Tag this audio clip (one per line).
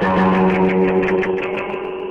guitar
lo-fi
loud
noise